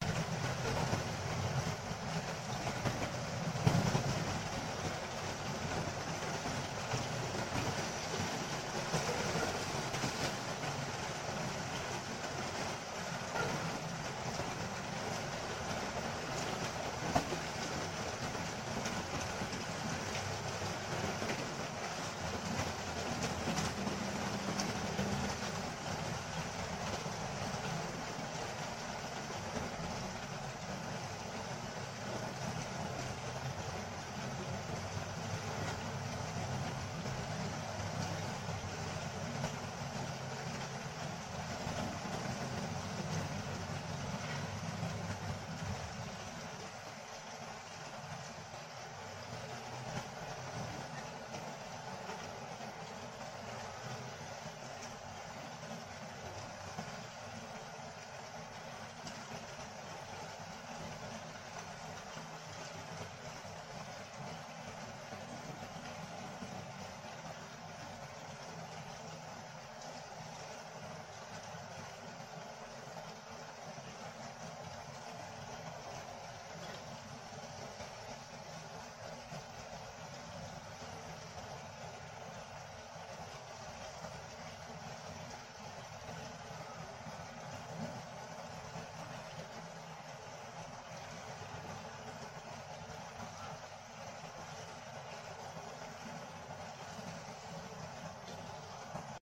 Soft rain recorded outside my bedroom.